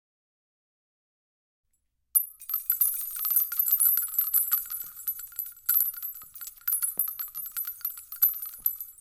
TEC9 Cart Montage
Lots of empty Rifle cartridges being dropped on concrete, originally used as SFX for spent cartridges dropping out a pair of machine guns. It's been sweetened up jangling a bunch of keys put low down in the mix to give it some extra zing.
shell, spent, case, casing, cartridge, rifle, empty, 9mm